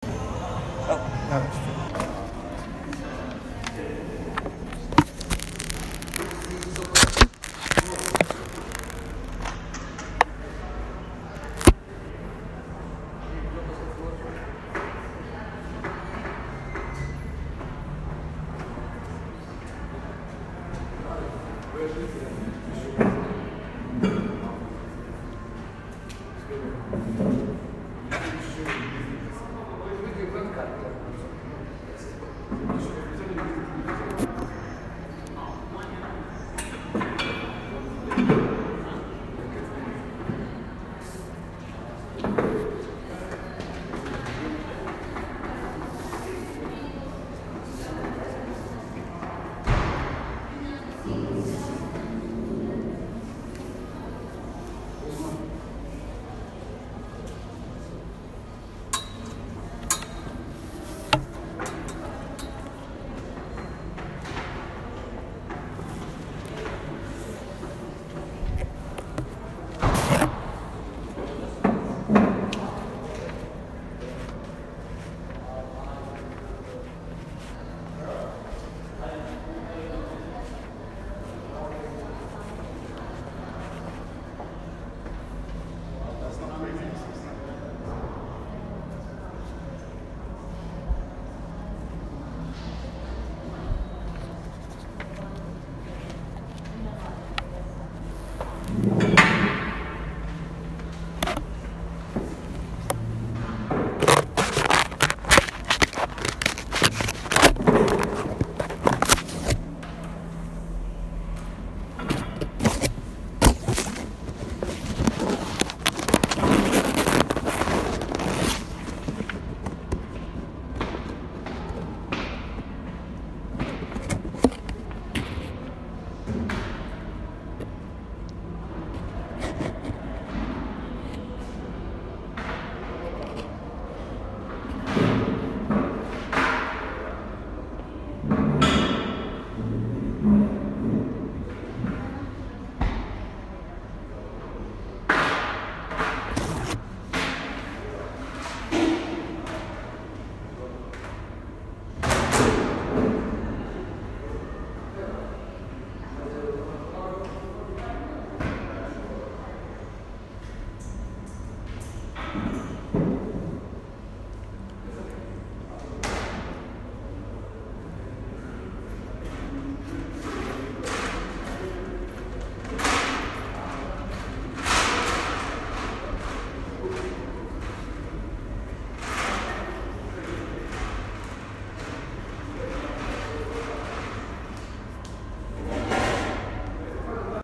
ambient hotel lobby
ambient, hotel